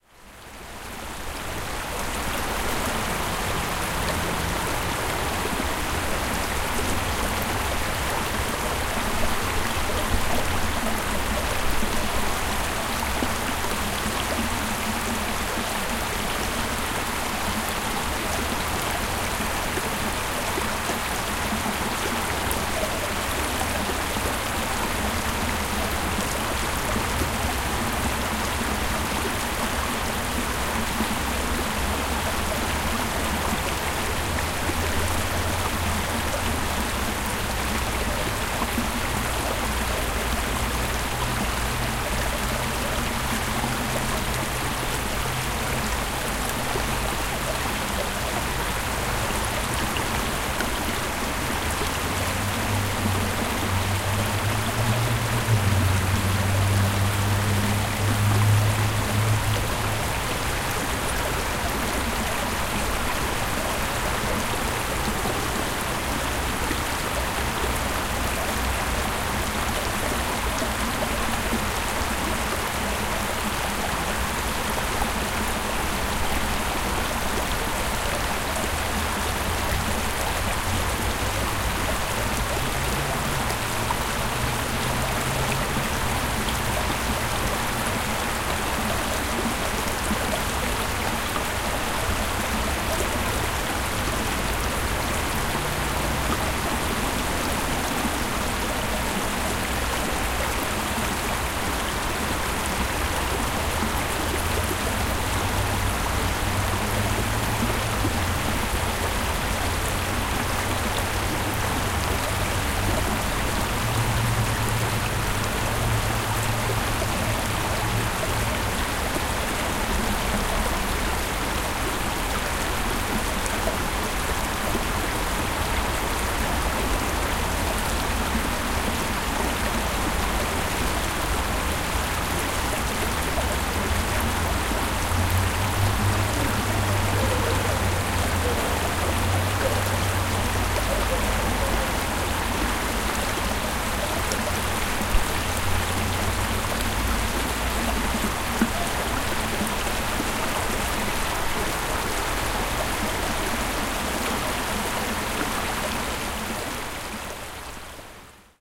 0285 Cheonggye stream 3
Water from Cheonggyecheon stream.
20120608